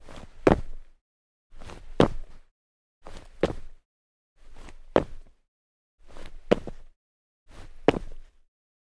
Footsteps Mountain Boots Rock Jump Sequence Mono
Footsteps, Jump and Land on Rock (x6) - Mountain Boots.
Gear : Rode NTG4+